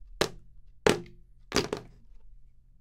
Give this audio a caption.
a jar hitting the floor
jar, falling, percussion, hit